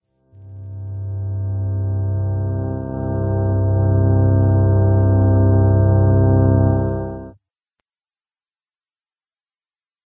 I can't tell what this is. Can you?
video game sounds games
game, games, sounds, video